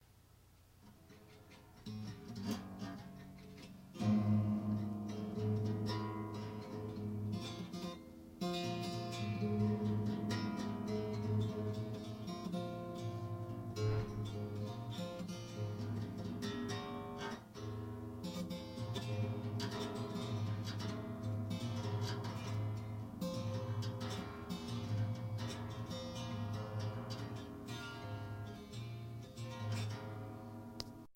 Guitar Strings (6)
acoustics, guitar